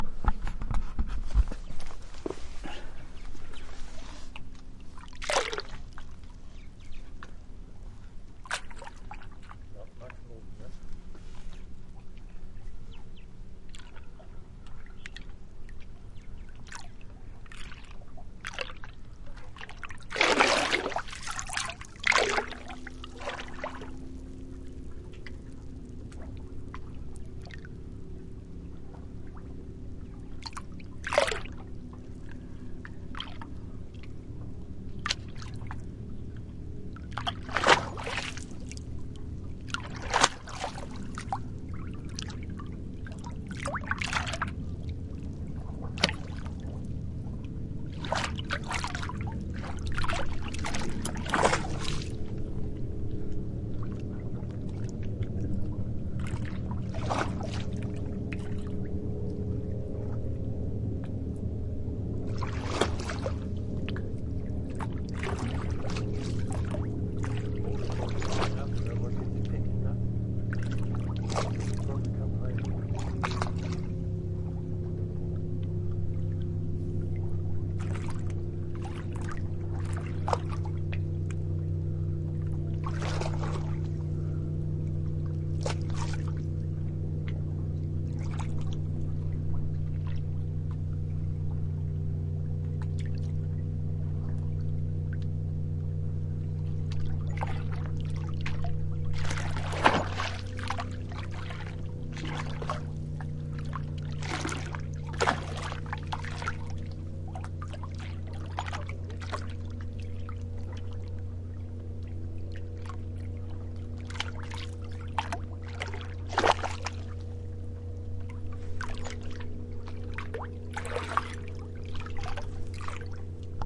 Gentle Water Laps on Georgian Bay